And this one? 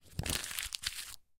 paper - crumple 02
Crumpling a piece of paper in my hand once.